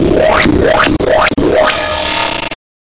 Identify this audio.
Whippity Whoop
A very fun, whippy bass sound